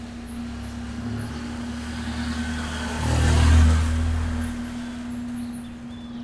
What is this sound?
motorcycle passes / moto passando
moto, vehicle, driving, motorcycle, street, pass